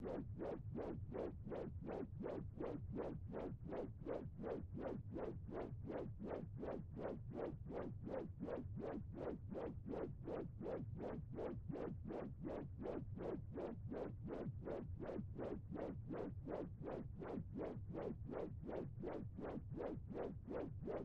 A sample that sounds like a pumping heart. The sound whooshes to a beat and reminds me of a time a while ago now listening to my children's own heart beats for the first time while they were still in the womb. I have created this sound using my favourite soft synth of the moment FabFilter Twin 2. I love to experiment with sound and make samples that I can relate to. I have not used any of the presets to make this sample, but have been having great fun exploring the synths capabilities and exploring the possibilities.